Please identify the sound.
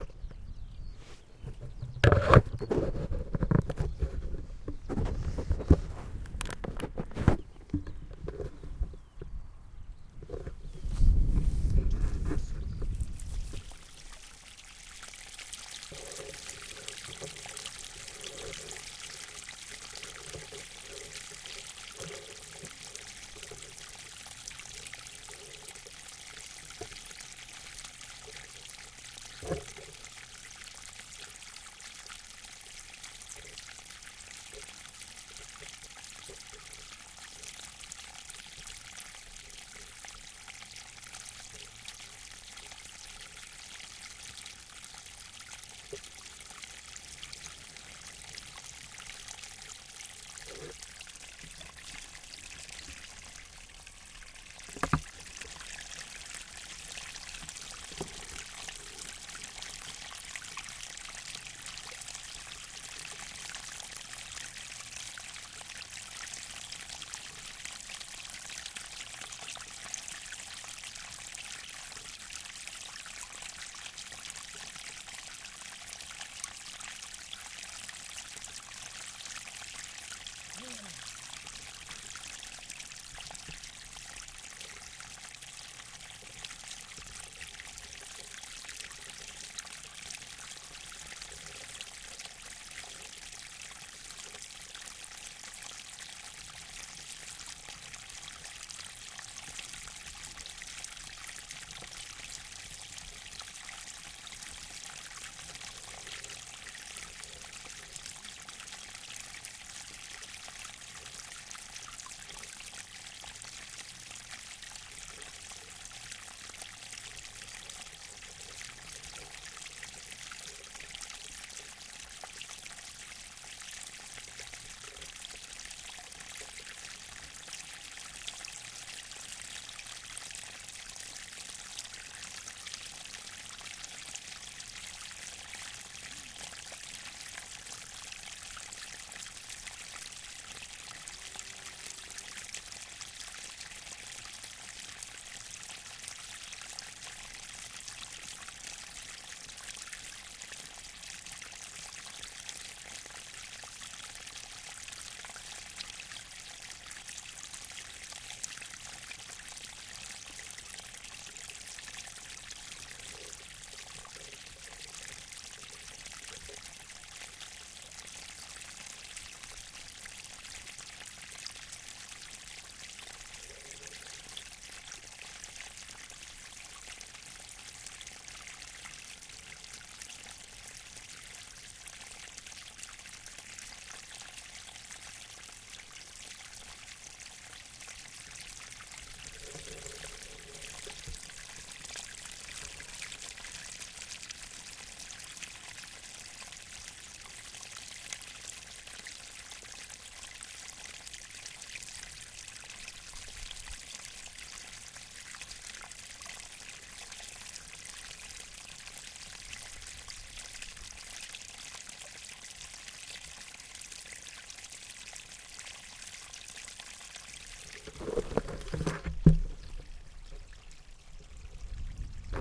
rocky-stream-in-mountains-surround-sound-rear
rocky stream in mountains
mountains, rocky, stream